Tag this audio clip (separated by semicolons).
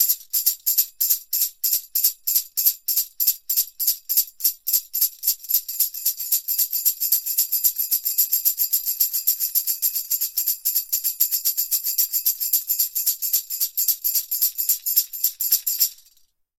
chime; chimes; drum; drums; hand; orchestral; percussion; percussive; rhythm; sticks; tambour; Tambourine